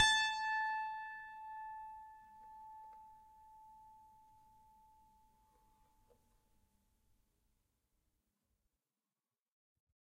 a multisample pack of piano strings played with a finger
fingered, multi, piano, strings